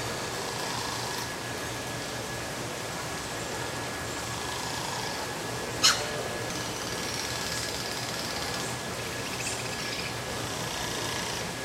Green Oropendolas are a type of blackbird from South America. Here one perches on a branch and makes these weird sounds.